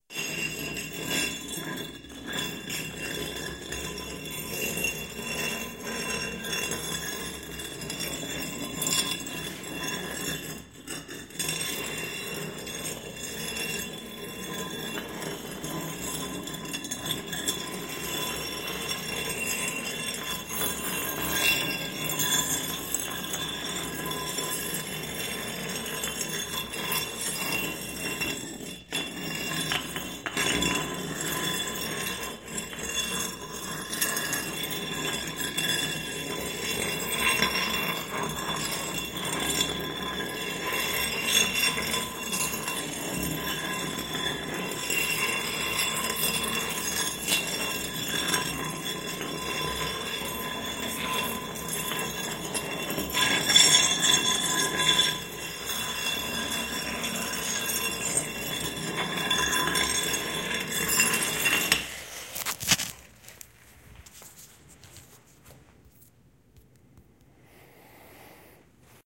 stone sliding
A slab of stone continuously moved around on a sandy tiled floor. Might help to create some adventure sounds like big stone doors swinging open, or a stone lock being released.
Adventure, Cave, Concrete, Drag, Rock, Sliding, Stone